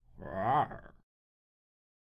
tiger roar sound
roar, tiger